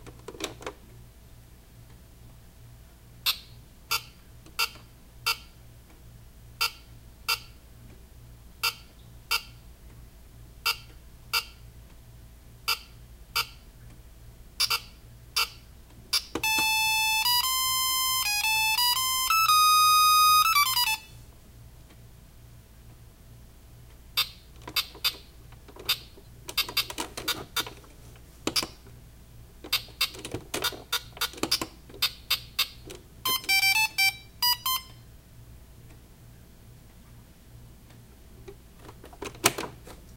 Old electronic game called "Caveman" made by Tomy this specimen was sold at Radio Shack. It's actually not a handheld as it resembles a miniature arcade console mixed with a TRS-80.
electronic, hanheld